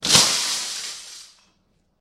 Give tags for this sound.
glass
loud
broken
field-recording
smash
explosion
dropped
window
pane

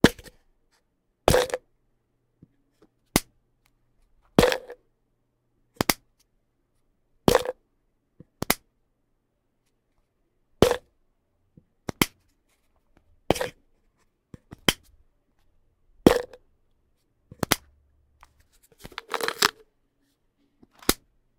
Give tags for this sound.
click
snap
close
bottle
pills
pill
rattle
pop
open
dull
plastic
lid

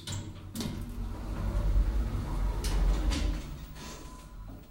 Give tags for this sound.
elevator
lift
open

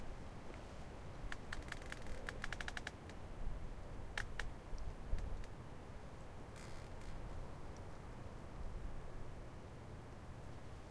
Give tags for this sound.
wood
nature
tree
creak

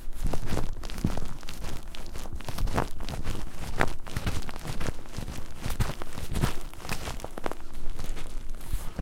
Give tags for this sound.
leather
rub
squeeze
wring
rubbing
plastic

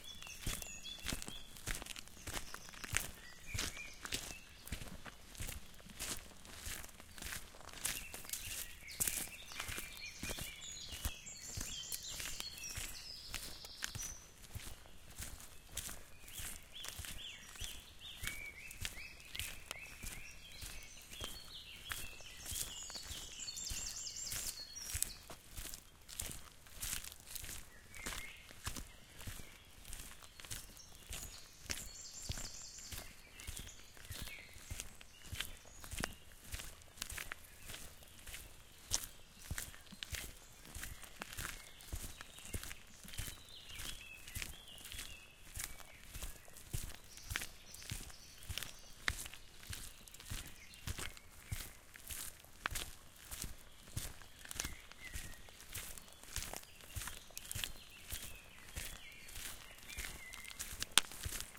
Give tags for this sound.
gravel
step
stomping
footstep
feet
forest-walk
shoes
Astbury
steps
Walking
country-walk
walk
Trecking
heels
boot-steps
walking-boots
woodlands
crunchy
woodland-birds
boots
foot
crunchy-steps
woodland
foley
footsteps
under-foot
rambling

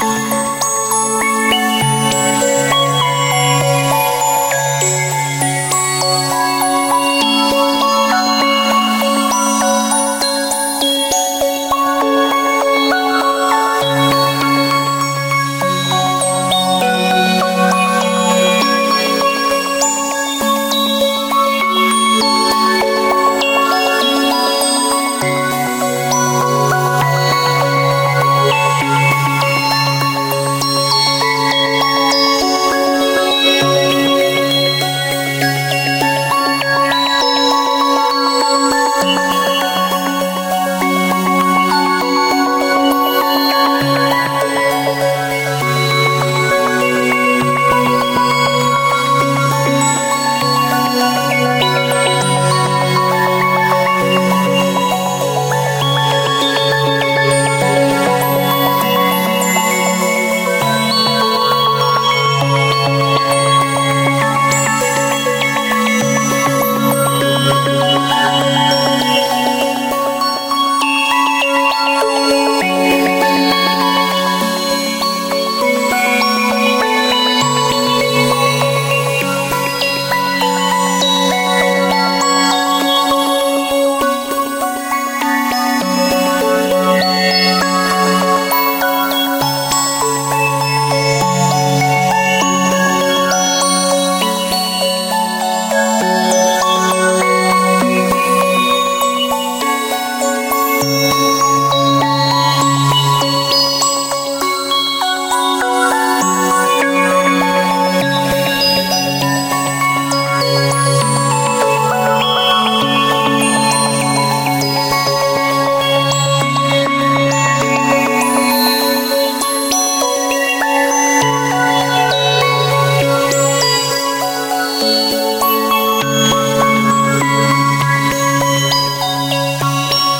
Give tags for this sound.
aura
energy
future
futuristic
sci-fi
spaceship

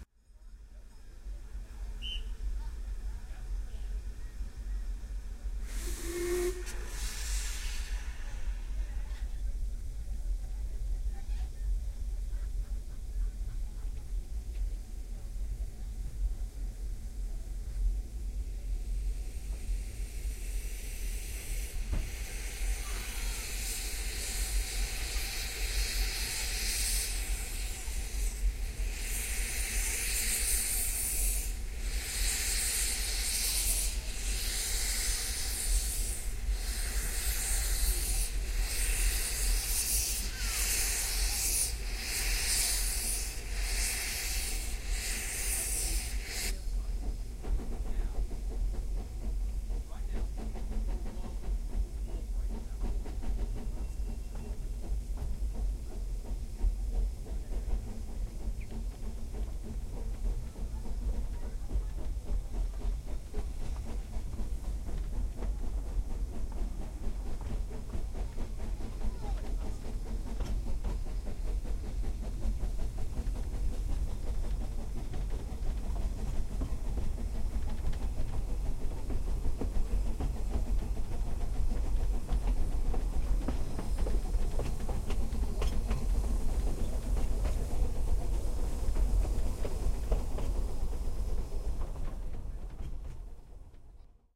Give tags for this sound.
railway
train
Ffestiniog
field-recording
whistle
carriage
station
leaving
quasi-binaural
pistons
locomotive
steam
Porthmadog
binaural

